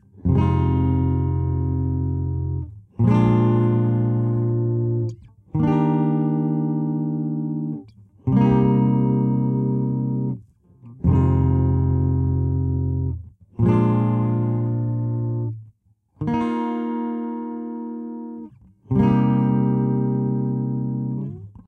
Andy 3 - 90 BPM - Gtr. 02 - Notas largas - 3rd Fret
Guitar recorded in a session using Boss GT-100 Effects proccesor.
Guitar, Long-notes, Picking, Recording